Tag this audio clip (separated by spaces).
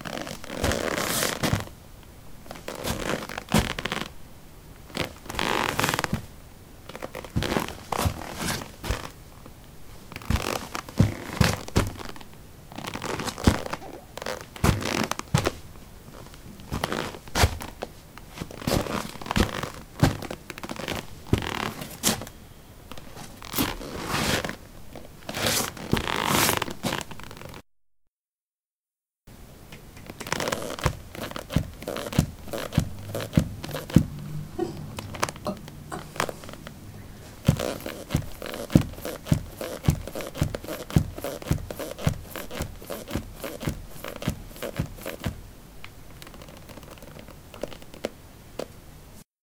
footstep step steps walk walking